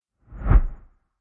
VS Short Whoosh 3
Short Transition Whoosh. Made in Ableton Live 10, sampler with doppler effect.
swoosh, game, effect, swish, whoosh, sfx, video, short, transition, foley, sound, woosh, fast, fx